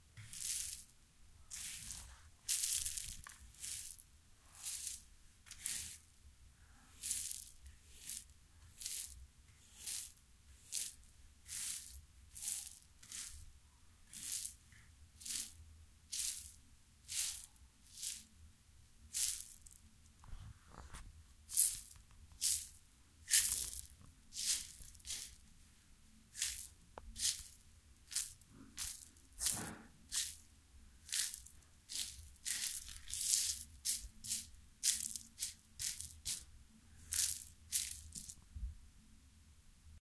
Sweeping beans scattered on the floor, sometimes with a broom, sometimes with hand.
It can be used for whatever bean-like small objects on the floor, like seeds, beads, pieces of plastic, etc.
broom, seeds, floor, beads